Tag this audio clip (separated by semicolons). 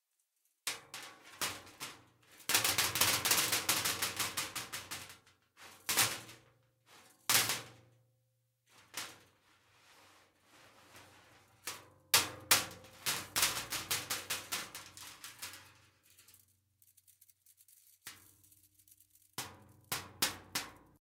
Alex; Boyesen; Digital; Ed; fence; hit; metal; Mixes; Sheffield; table; wire